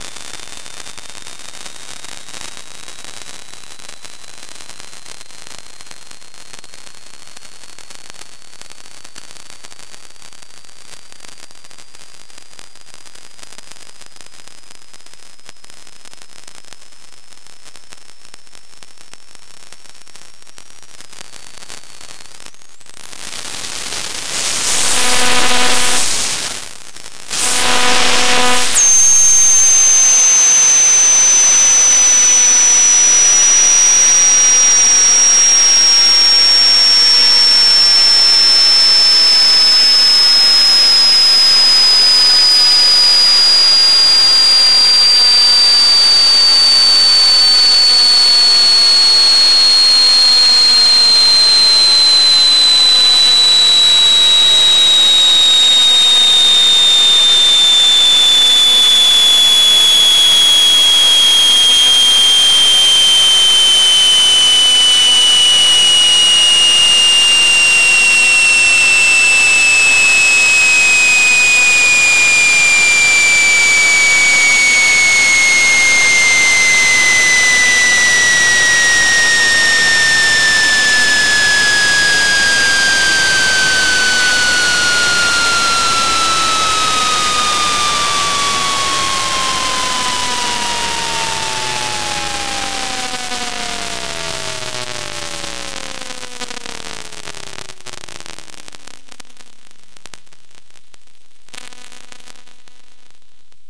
A cheesy AM/FM/TV/CB/WEATHERBAND radio plugged into the dreadful microphone jack on the laptop out on the patio.